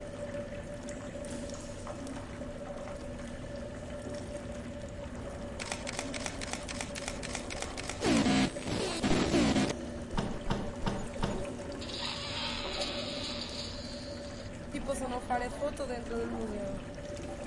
Caçadors de sons - Foto en el museu
Soundtrack by students from Joan d'Àustria school for the workshop “Caçadors de sons” at the Joan Miró Foundation in Barcelona.
Composició del alumnes de 3er de l'ESO del Institut Joan d'Àustria, per el taller ‘Caçadors de sons’ a la Fundació Joan Miró de Barcelona.
Barcelona, Cacadors-de-sons, Fundacio-Joan-Miro, Tallers